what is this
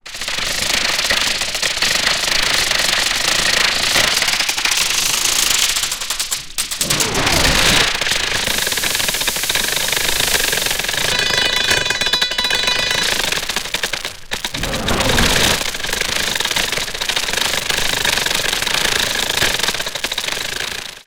Spoke Spinna 06
Da spoke, da spins, da storted. Field recording of a bike tire spinning, ran through several different custom distortions.